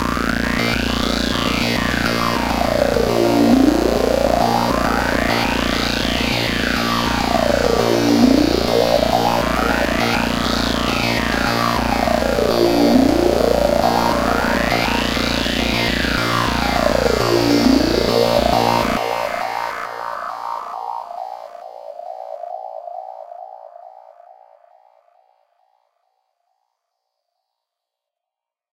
higher state acid bass 102
This is a sequence of bass notes created using vst instrument Alchemy on preset DanceTrance/ Leads/ Higher States. Run through Ohm Boys LFO Delay and sequenced at 102bpm at A#3. Works well with my 'break away 102' breaks.
The best way to loop this is to take the last two breaks of the sequence and loop those as the effects roll nicely into each other. I've left the ending part in if you want to let it fade out smoothly into your sequence as the bassline ends.
alchemy, acid, 102-bpm, bassline, vst, bpm, vsti, 102, bass, 102bpm, sequence, stereo